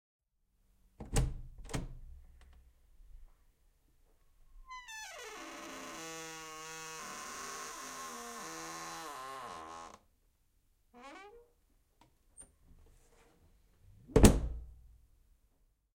wood door
door, wood, opening, closing
closing, door, opening, wood